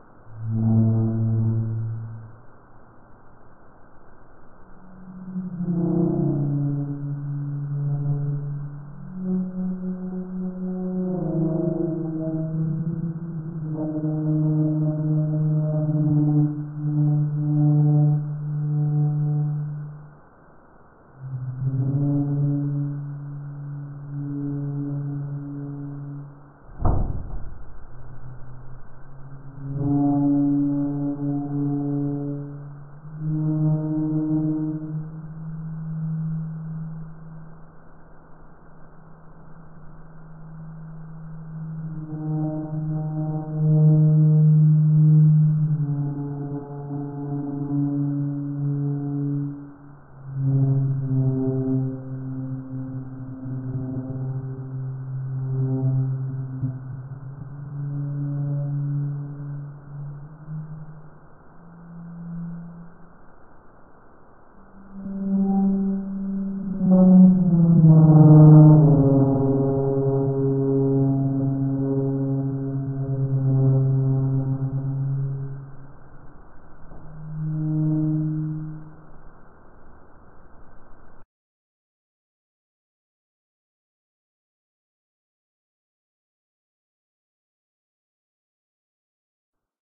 Deep Metal Hull Scrape
hull, metal, scrape, ship, sub